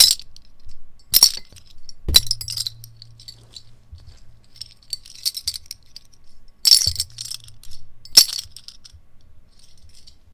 Bottle caps being tossed around and caught together, sounds like coins in me pirate bag!
Bottle, Bottle-caps, Caps, Clinking, Coins